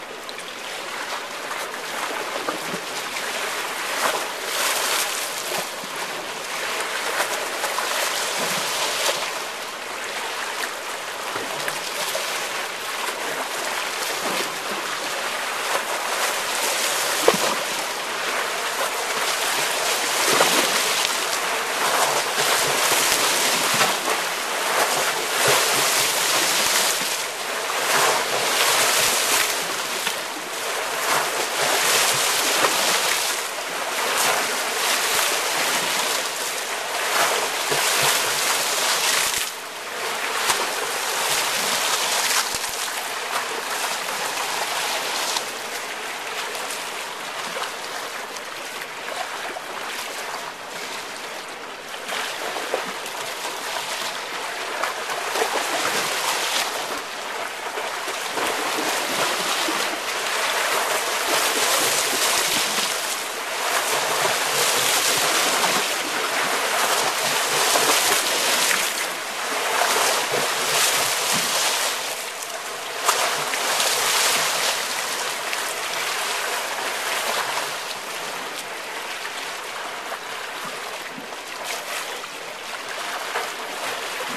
Water in channel
Water in the Amsterdam - Rijnchannel recorded with a Flip-camera after a ship has passed. Spring 2011